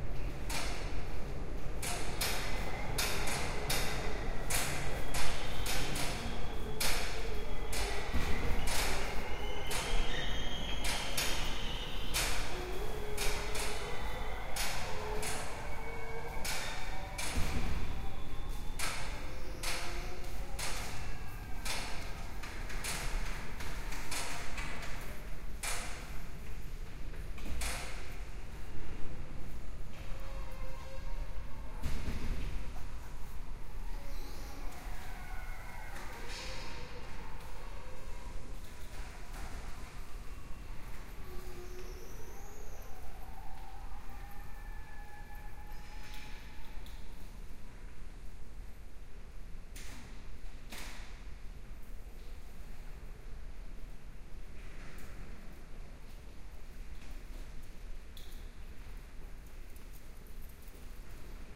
A strange howl made by the wind running through revolving doors. Stereo binaural.